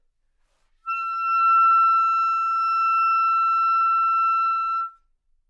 Part of the Good-sounds dataset of monophonic instrumental sounds.
instrument::clarinet
note::E
octave::6
midi note::76
good-sounds-id::712